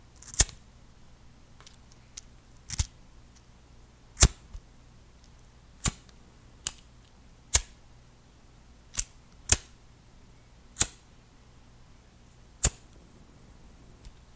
Flicking my bic recorded with laptop and USB microphone in the bedroom.
bedroom, lighter, foley, noises, flick, flint